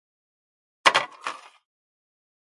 Placing plate on the tray